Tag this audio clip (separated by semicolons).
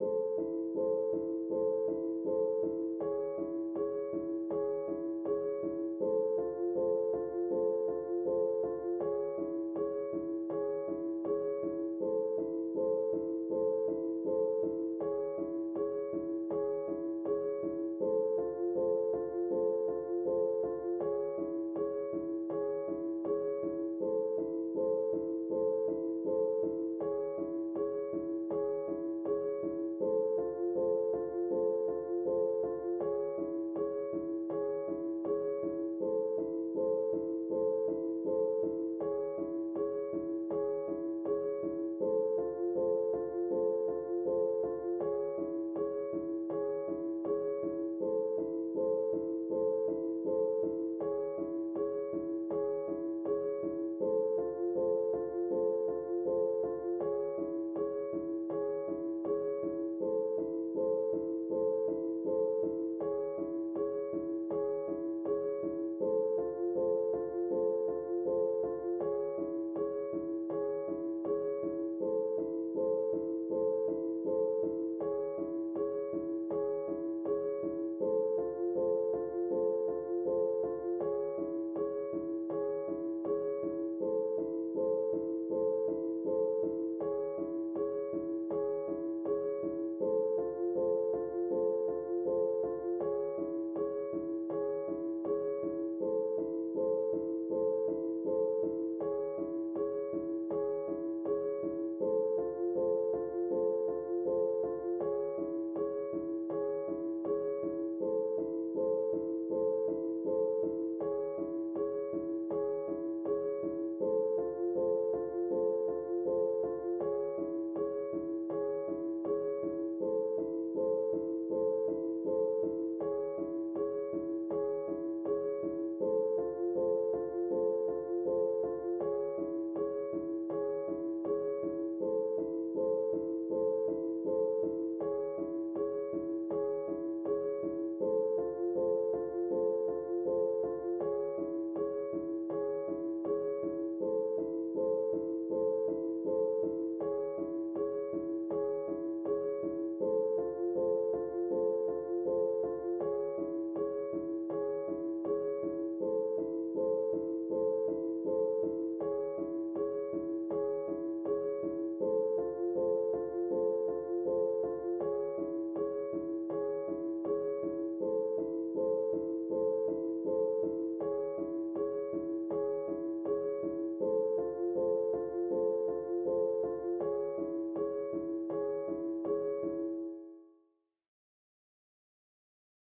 80; 80bpm; bass; bpm; dark; loop; loops; piano